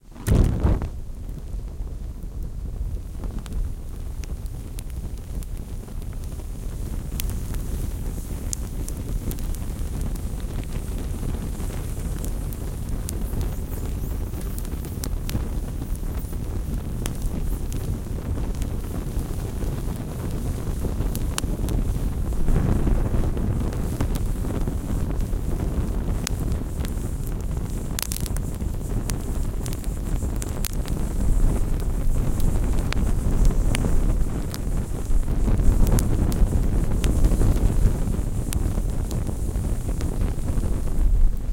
A small gasoline fire recorded with a stereo microphone.
burning, flame, fire